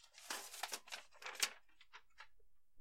Picking Paper Off Ground3
Picking a paper up off the ground.
pages, rustling, paper